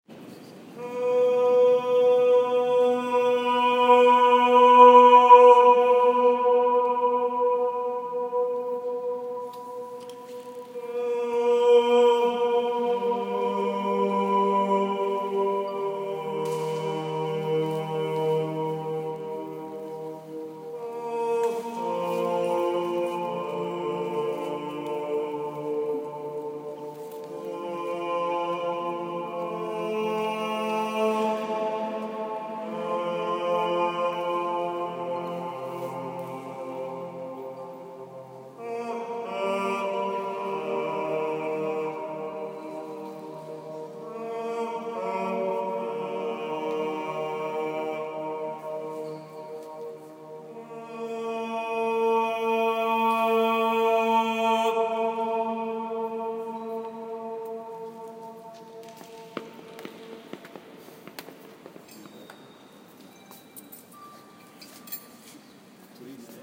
Every hour a ticket inspector goes with this "a cappella" singing.